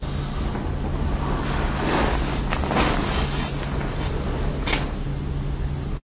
Moving while I sleep. I didn't switch off my Olympus WS-100 so it was recorded.
human, field-recording, lofi, household, nature, bed, noise, body, breath